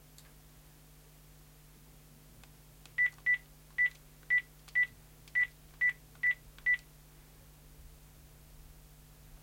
Mobile phone - Dialing tones fast L Close R Distant
Dialing on a mobile phone. Beep tones. Recorded in studio. Unprocessed.
akg, beep, cell, cellular, channel, close, dial, dialing, distant, dual, fast, foley, fostex, mobile, mono, perspective, phone, pov, quick, quickly, rode, studio, telephone, tone, unprocessed